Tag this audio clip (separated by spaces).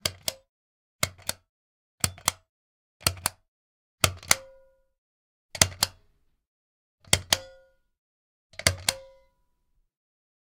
light switches string pull click switch toggle roof